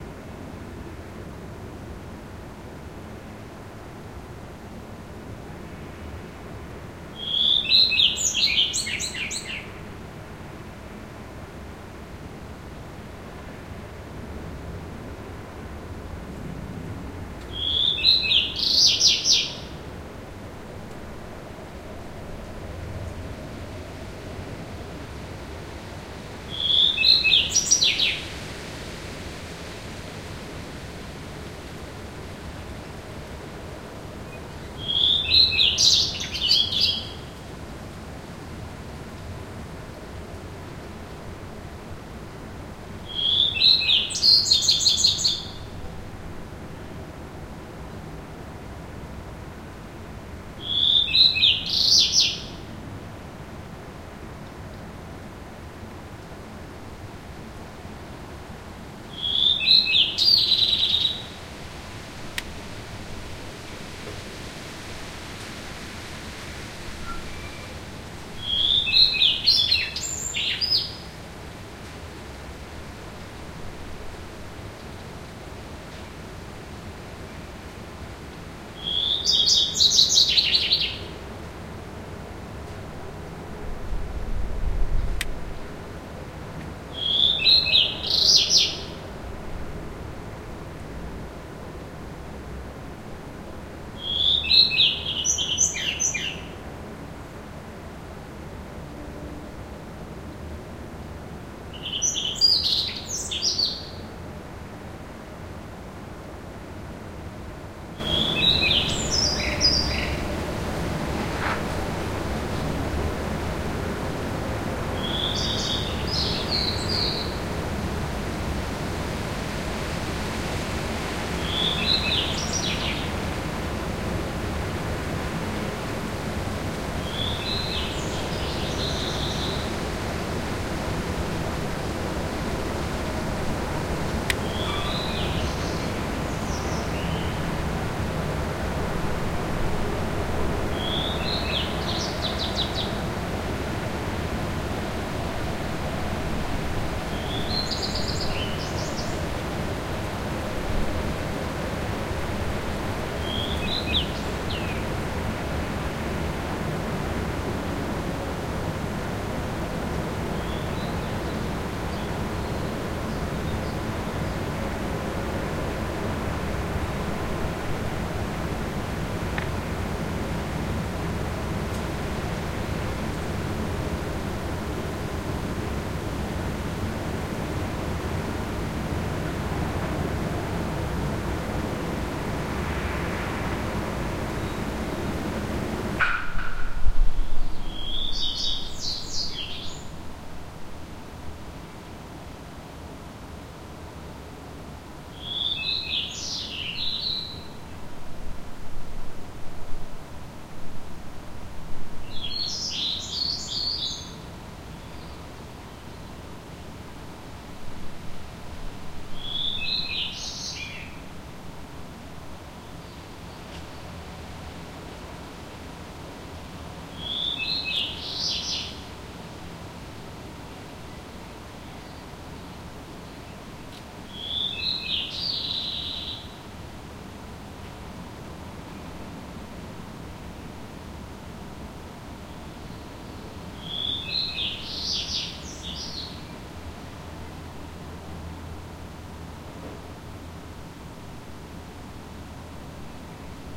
City morning bird
City Bird in Tbilisi, Sololaki 4:00 AM
Tascam DR-40x
background-sound, ambient, background, Tbilisi, early, ambience, morning, soundscape, general-noise, sound, noise, spring, ambiance